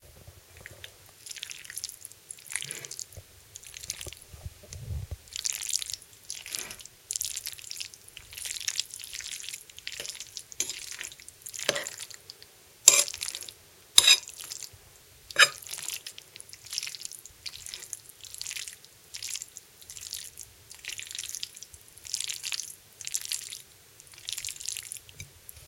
Mixing pasta with a spoon.
food, pasta, slop, spoon, squelch